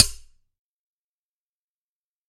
Impact noise - no resonance.
field-recording, ping, metallic, impact, metal, sword